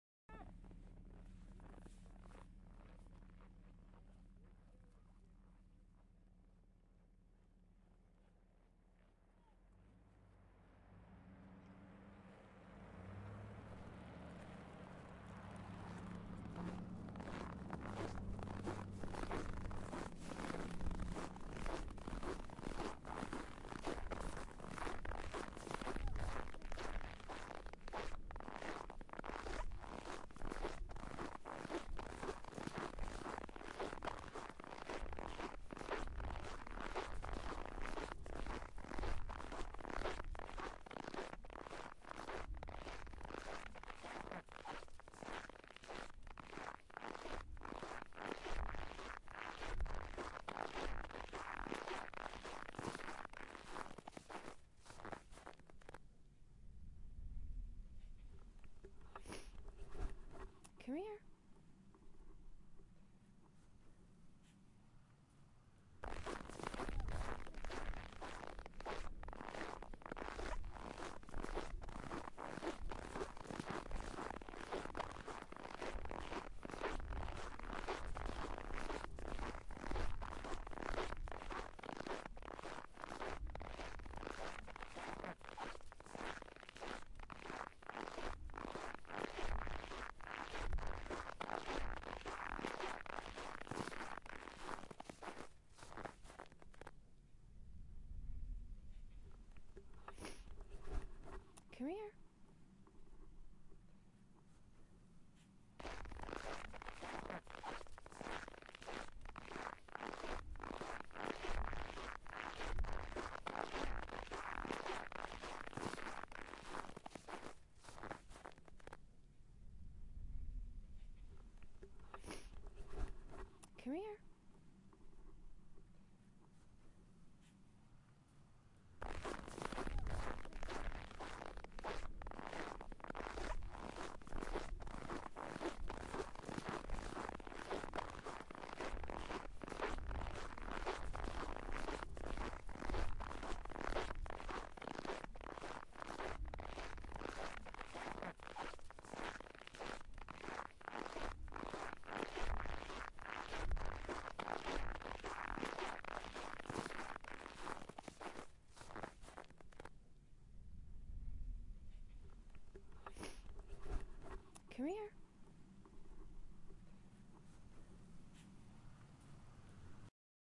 walking on snow in Ouje
This is the sound of walking through snow in Ouje-Bougoumou, Quebec. Typical of northern snow - squeaky!